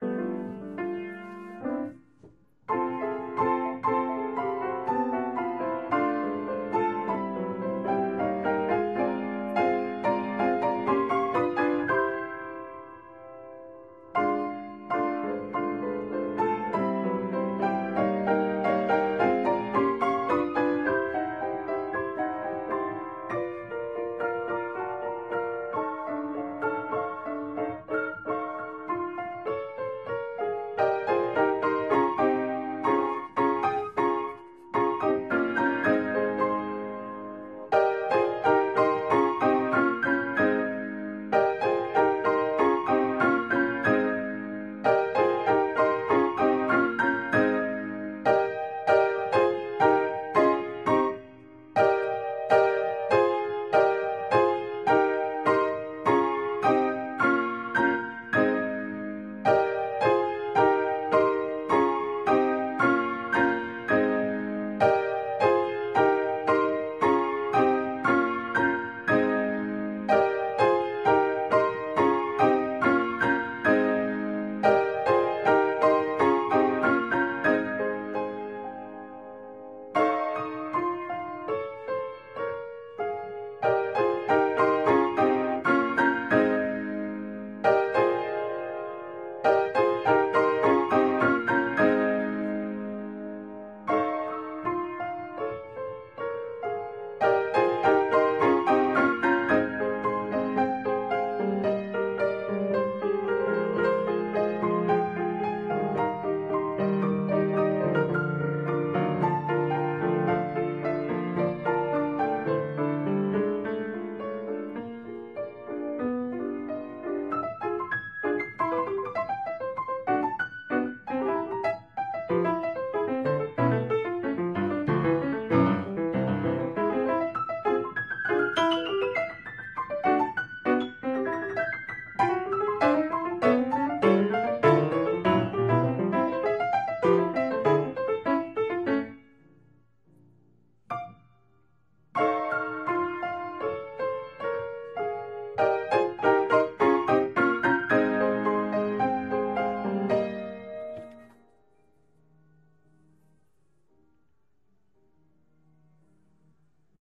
Practice Files from one day of Piano Practice (140502)
Piano, Logging, Practice